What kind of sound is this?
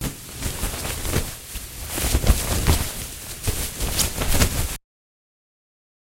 sound of clothes moving
Garcia, clothes, moving, music152